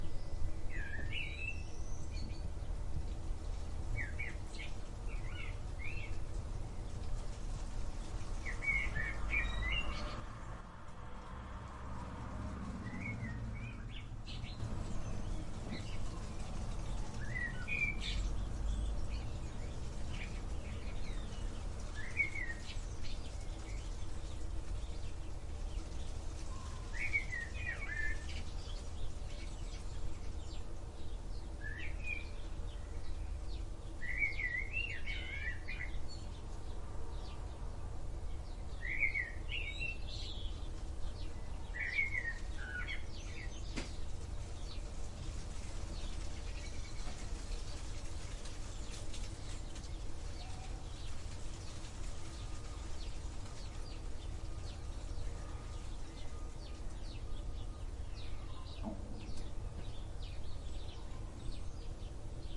A breezy day in rural South Yorkshire. Blackbird singing on the top of a hedge but recorded this from inside our garage, hoping to be out of the wind. A passing car didn't help before the bird flew away. Sparrows in the background.